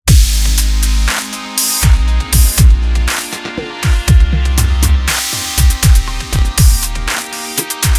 Chill Liquid Trap Loop
Chill Trap-ish loop.
bass, beat, calm, chill, drum, drums, key-of-C, liquid, loop, loops, music, relaxed, rhythm, song, trap